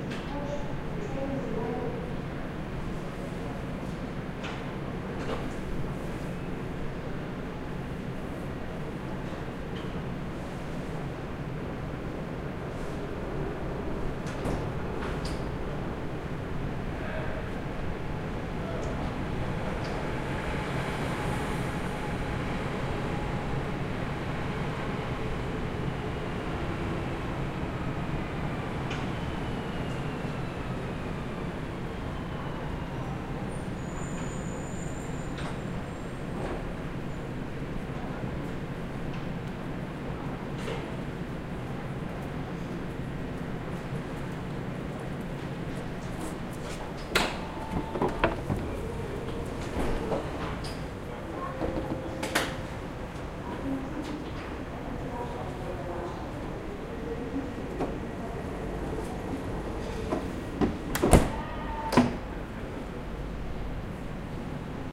trainstation atmopheric+door 001
train doors + general platform sound
door-sound, field-recording, platform, rail, railway, railway-station, station, train, trains